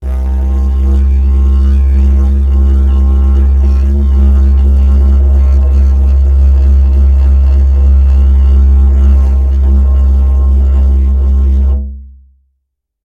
Long didgeridoo tone, good for sample